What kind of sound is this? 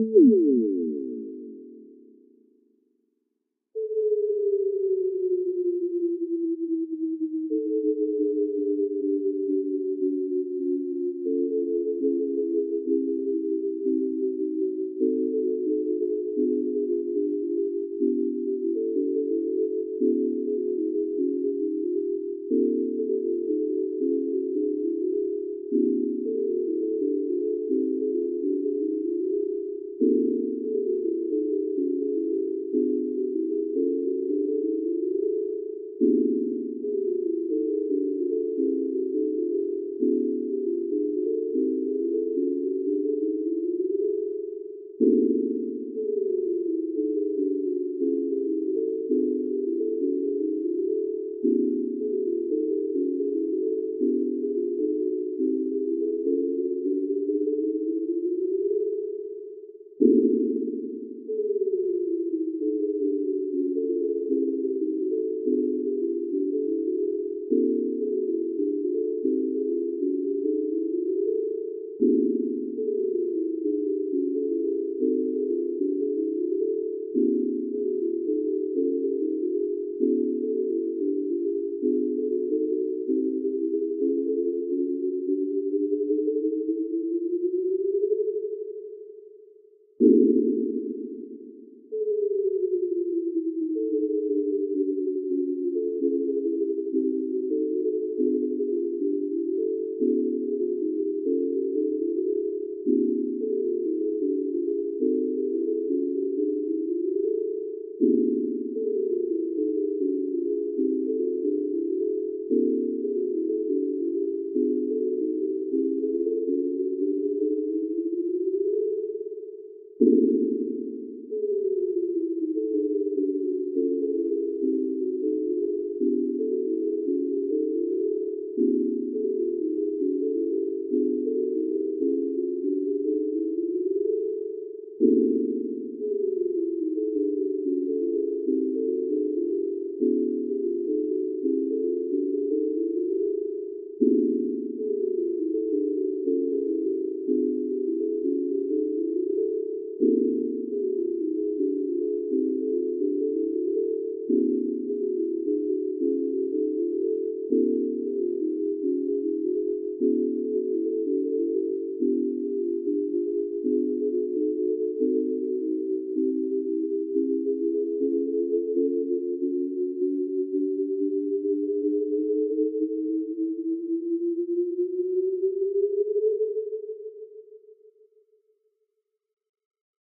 Whitney Music Box, Variation 6.
In this variation the 48 dots are arranged to trigger microtonal pitches in one octave.
Music generated using my own syd synthesis software.
whitney, wmb, microtones, jsyd, whitneymusicbox, processmusic